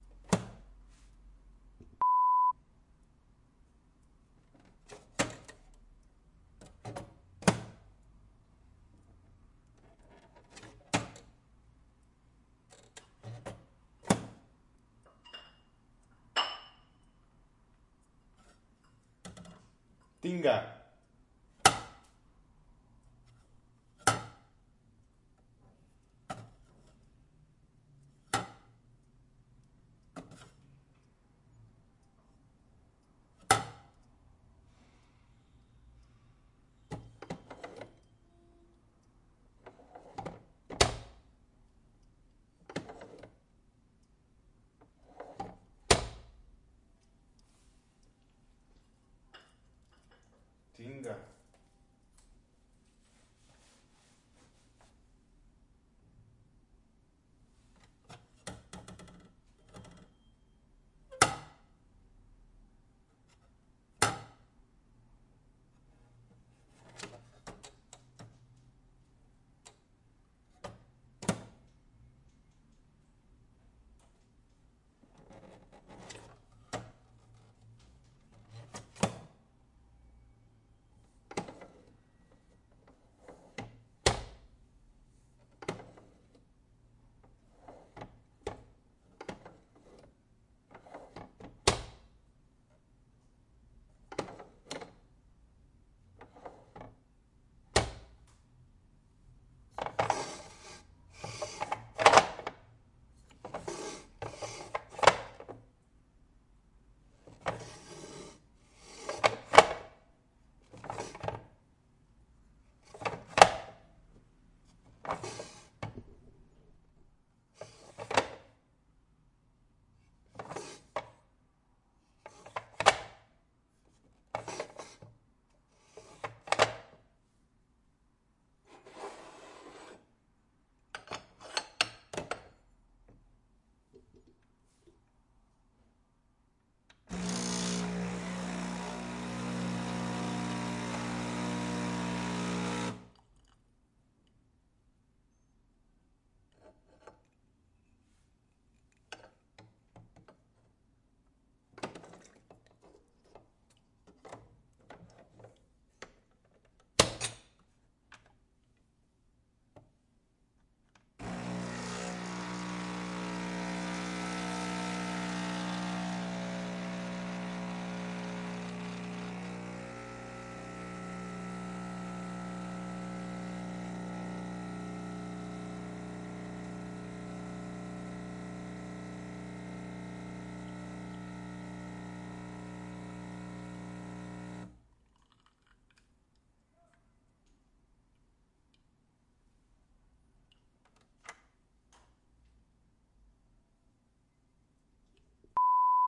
Nespresso Machine Brewing a small Expresso Coffee
Joaco CSP